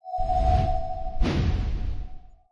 A shorter FTL Drive sound.